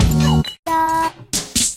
Dub (138 BPM-FIVE23 80174)
broken-step, dub, fill, glitch, table-effects